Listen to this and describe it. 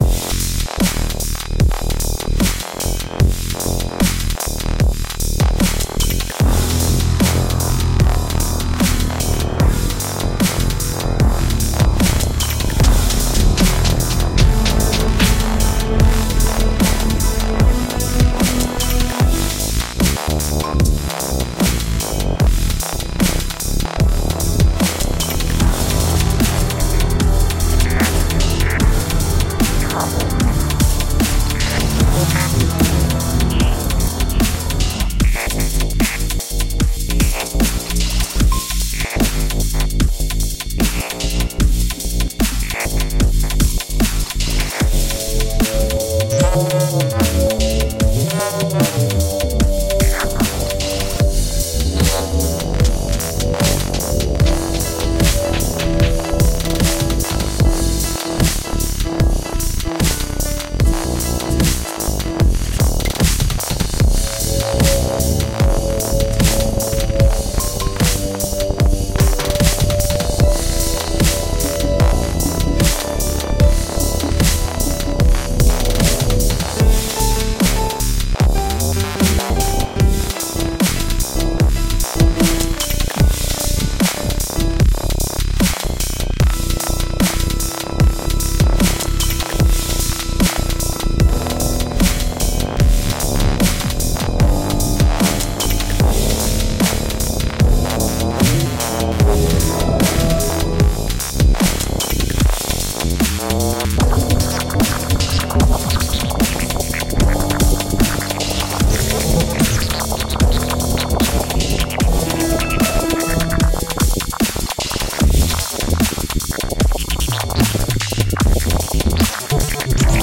A small chunk of my newest Dub Stuff in perfect Loop Format.